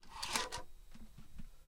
Taking 3.5-inch floppy-disk from floppy disk drive
Typical sliding sound a 3.5-inch floppy-disk makes when someone has ejected the disk and takes it from the floppy disk drive.